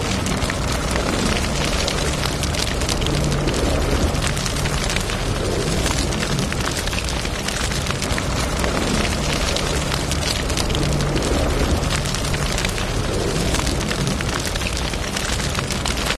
Fire Burning Loop
Fire Burning in a loop
Burn, sounds-real, Fire, Loop